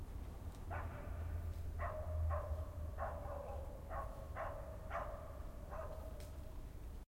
Barking Dog 1
A dog is barking during his last walk of May the 30th 2007. An Edirol R09 in the hammock on my balcony recorded this.
animal, bark, dog, field-recording, nature, street, street-noise